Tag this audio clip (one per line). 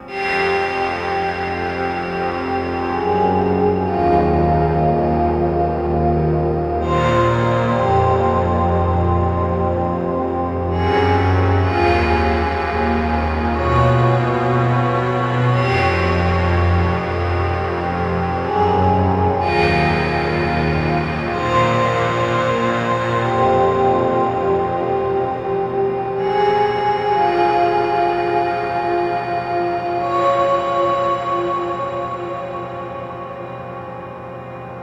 electronica
industrial